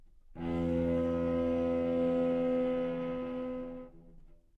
Cello - F2 - bad-richness
Part of the Good-sounds dataset of monophonic instrumental sounds.
instrument::cello
note::F
octave::2
midi note::29
good-sounds-id::4542
Intentionally played as an example of bad-richness